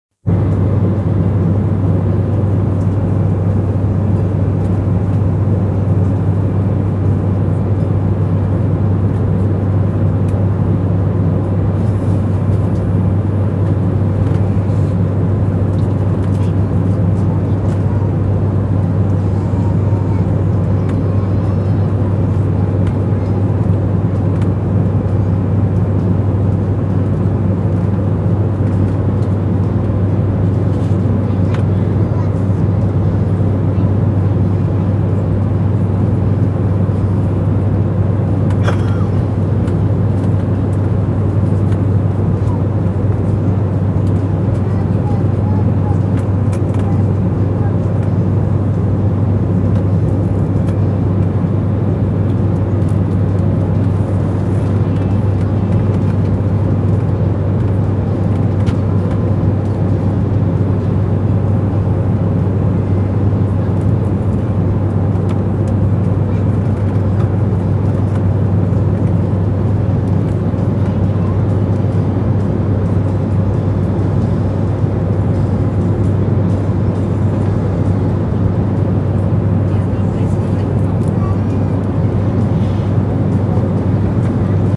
Ambient flight airlines airport
airport, flight